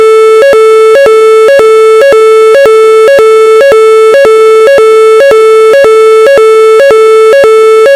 AMIZET Hugo 2013 2014 son1
.:: Synthetic sound made with Audacity ::.
- Generate on 2 differents tracks a 440 Hz tone (0,53 second). On the first track, choose a sine form, and on the second track, choose a sawtooth form.
- Select the part between 0:42 and 0.53. On both tracks, apply the "change pitch" effect (26%).
- Repeat the outcome 15 times.
- Change the gain of the two tracks : +8dB on the first track, -8dB on the second track
NB : The excessive saturation is intentional, to make the sound much more agressive.
.:: Typologie ::.
Impulsion variée
.:: Morphologie ::.
Masse : Plusieurs sons seuls
Timbre harmonique : Dynamique, acide
Grain : Lisse
Allure : Pas de vibrato
Dynamique : Attaque violente
Profil mélodique : Variation scalaire
Profil de masse : Site